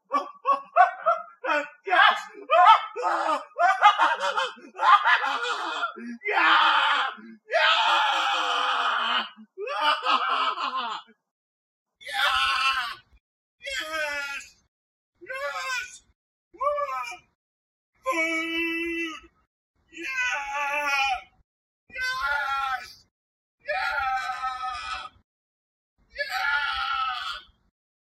A desperate or crazy man yells with joy. Originally made for a castaway finding food
Yes, Male, Cheer